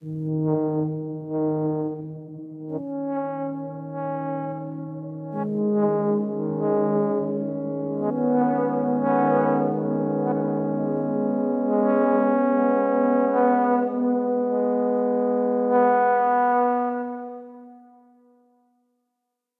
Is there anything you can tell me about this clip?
tuba fanfarre
tuba processed sample remix
stretching, transformation